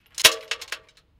20131202 chain joint hits metal ZoomH2nXY
Recording Device: Zoom H2n with xy-capsule
Low-Cut: yes (80Hz)
Normalized to -1dBFS
Location: Leuphana Universität Lüneburg, Cantine Meadow
Lat: 53.228726107474785
Lon: 10.39842277765274
Date: 2013-12-02, 13:00h
Recorded and edited by: Falko Harriehausen
This recording was created in the framework of the seminar "Soundscape Leuphana (WS13/14)".
University, Campus, Soundscape-Leuphana, xy, chain, Outdoor, Leuphana, Percussion